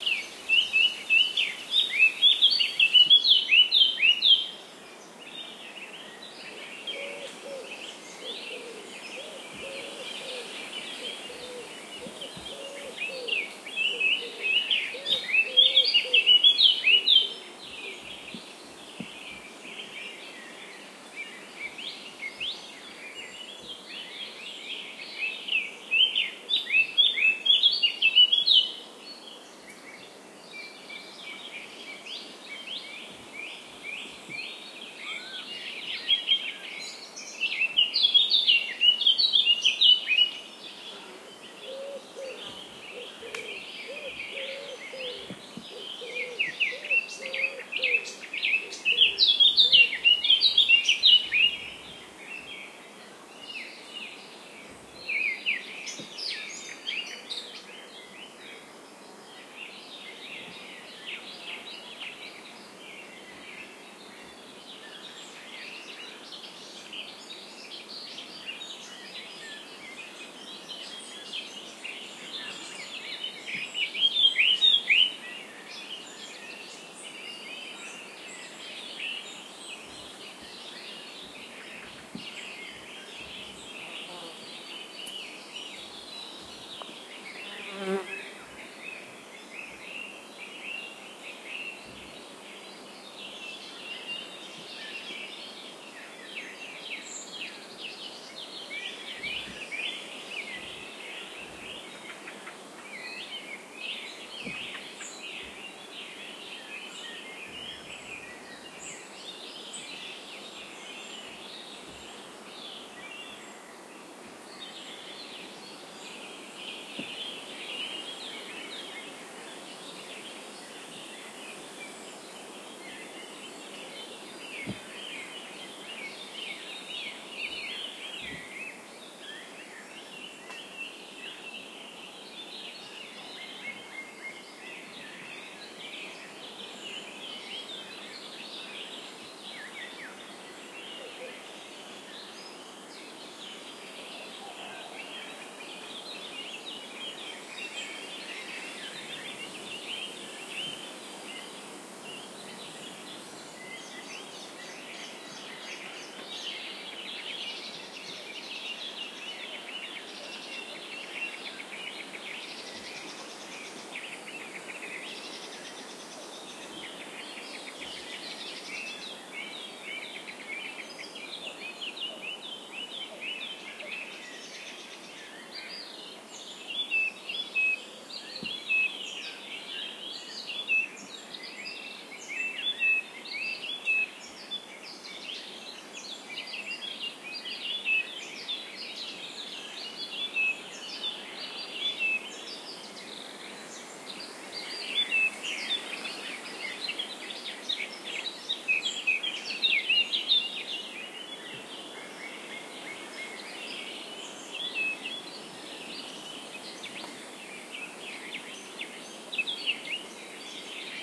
Hungary Meadow Morning Birds
Field Recording. Morning birds and crickets around me in the meadow. Recorded with handheld Tascam DR-40